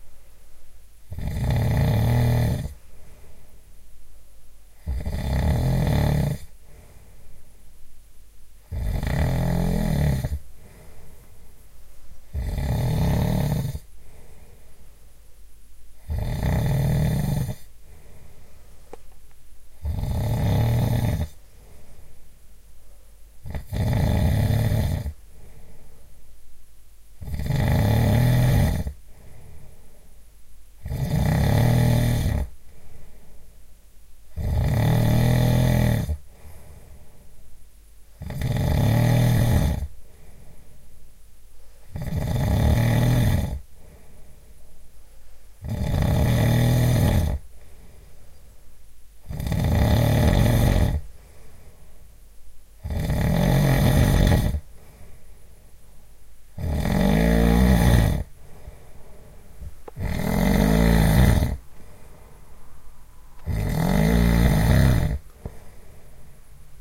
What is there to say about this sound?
Schnarchen - Mann
real snoring of a man